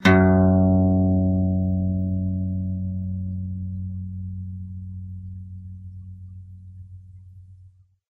Single note played on an acoustic guitar from bottom E to the next octave E
acoustic, acoustic-guitar, guitar, pluck, single-note